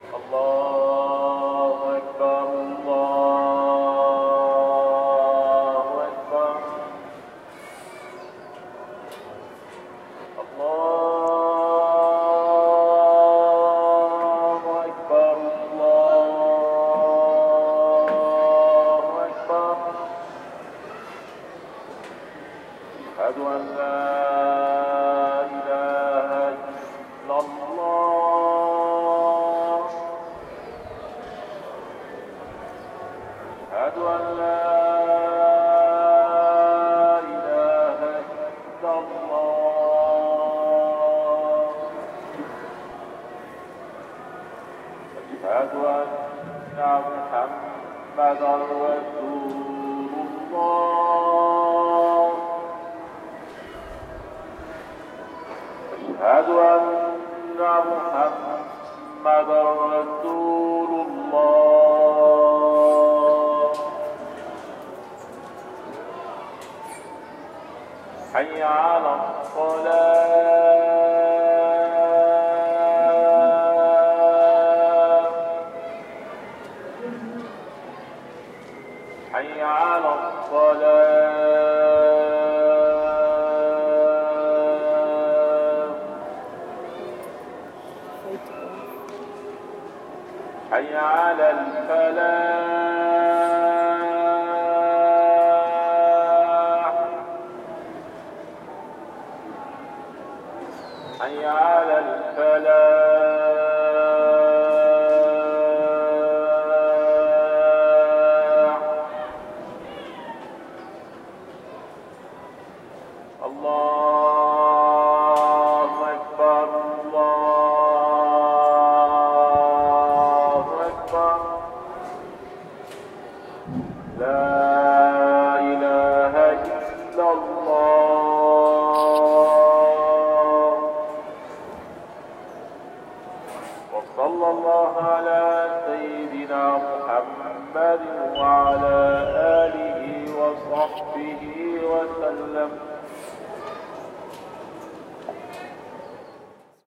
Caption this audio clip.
Mosque Call for Prayer
A recording of a prayer I have done in Sabra Palestenian camp area in Lebanon using a Zoom H4N. Quiet and clear Mosque call with soft background neighberhood with kids and market sounds.
Please use with respect to this religion and any other.
kids, background, city, call-to-prayer, prayer, pray, Palestenian, religion, Camp, Akbar, ambience, Sabra, Lebanon, Mosque, Islam, ambiance, Beirut, Muslim, muezzin, market, Allah, neighbourhood